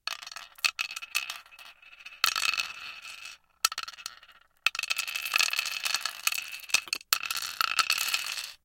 Glass mancala pieces being dropped into a wooden board.
mancala, wood, clatter, glass, game